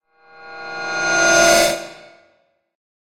Horror Sliding Transition
Recorded with a Zoom H2.
trailer, climax, build-up, climactic, cinematic, transition, tension, fright, glissando, surprise, bang, reveal, end